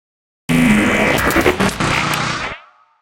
Gave myself a wee sound challenge tonight and knocked up some transformer noises.
alien, droid, cyborg, robotic, robot, machine, galaxy, mechanical, automation, spaceship, android, Transformer, bionic